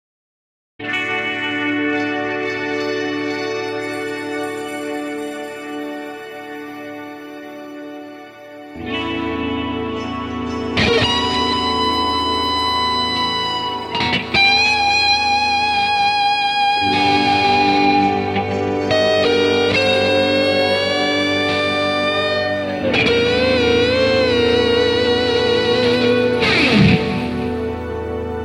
Spacey Guitar Clip
spacey, solo, guitar